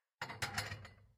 Moving plates.
{"fr":"Assiettes 3","desc":"Bouger des assiettes.","tags":"assiette couvert cuisine"}
kitchen, dishes, cutlery, plate